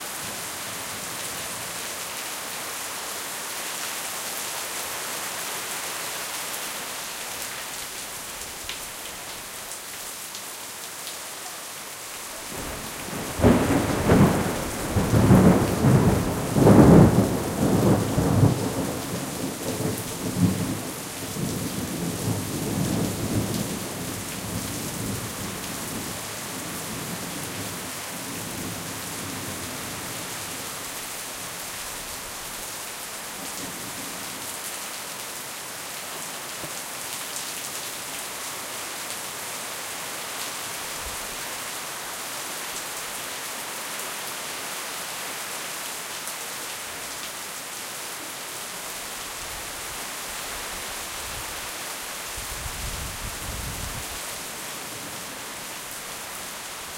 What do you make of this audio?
Tropical rain w thunder
Recording of rain
field-recording, lightning, nature, rain, storm, thunder, thunderstorm, weather